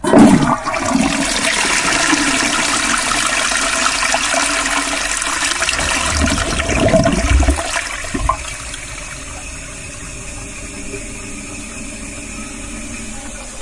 A flushing toilet :-)
Flush, Toilet, Water